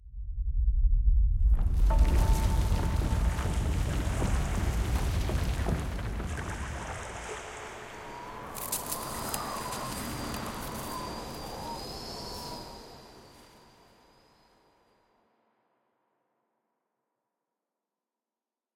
Weathering 01 - earth, water and frost.
The ground quakes and landslides, water flows, and ice cracks beneath your feet under a howling wind.
done as a request